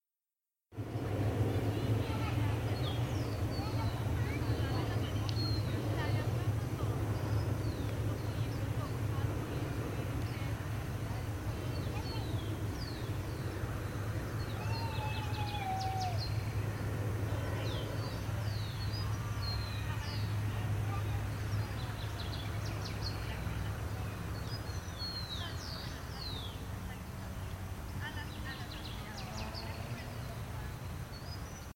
gutierrez mpaulina baja fidelidad parque aire libre
Park mothers and children
playground, park-ambiance, children